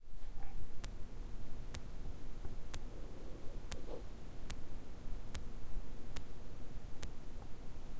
Heart mechanic valve
here is a recording of my mechanic valve with a Tascam DR100
body, Valve